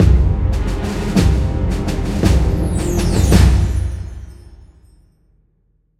Jingle Lose 00
A dark and serious orchestral jingle lose sound to be used in fantasy games. Useful for when a character is dead, an achievement has failed or other not-so-pleasant events.
death, epic, fail, fantasy, game, gamedev, gamedeveloping, gaming, indiedev, indiegamedev, jingle, lose, lost, sfx, video-game, videogames